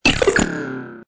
sound-design created from a field-recording of water recorded here in Halifax; processed with Native Instruments Reaktor and Adobe Audition
stab, industrial, water, field-recording, sound-design, electronic, processed, dark